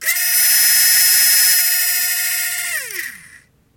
Toys-Borken RC Helicopter-15
The sound of a broken toy helicopter trying its best.
broken, buzz, gear, helicopter, machine, motor, toy, whir